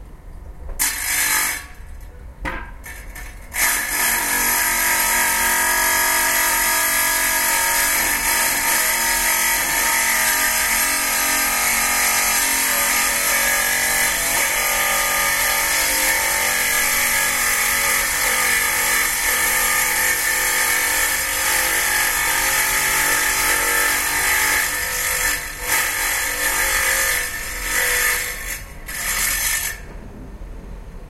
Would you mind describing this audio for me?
30 seconds of a metal grinder working some steel